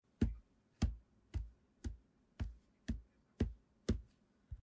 walk indoor barefoot
small walk_indoor barefoot